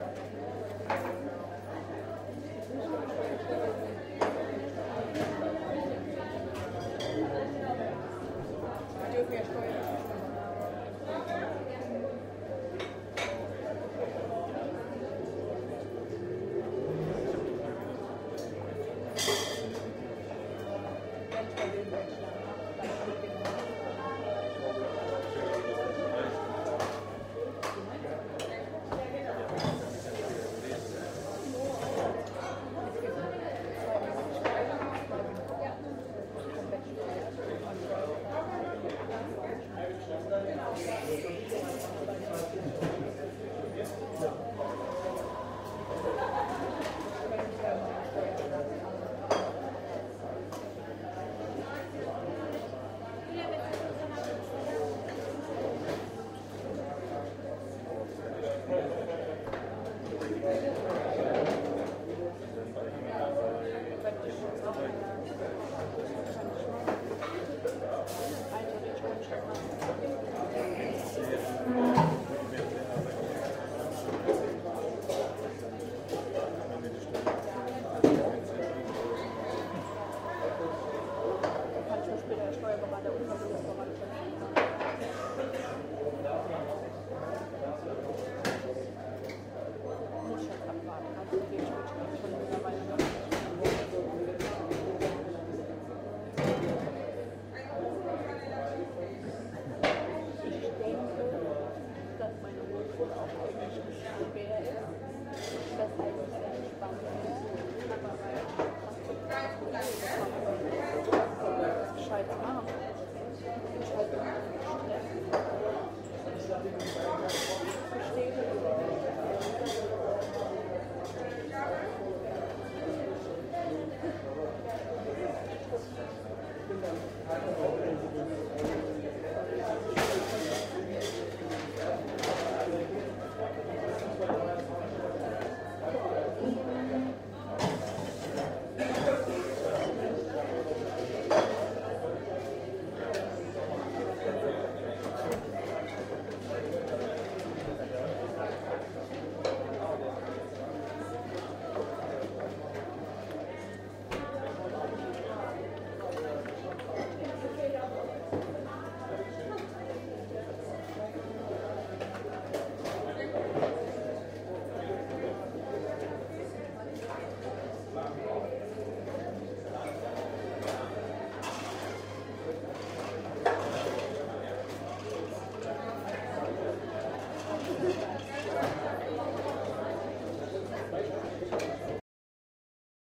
Café Atmo loop
Atmospheric sound recording of a local coffee shop in Mannheim, Germany.
ambient, Starbucks, background, field-recording, atmos, atmosphere, atmo, background-sound, ambiance, ambience, general-noise, Caf, Coffee-shop, atmospheric, soundscape